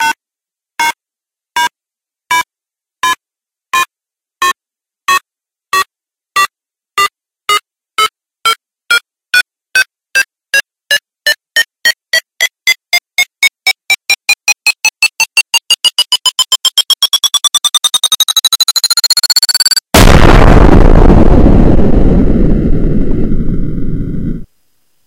Countdown-Boom
ticking bomb-like countdown until it booms!!!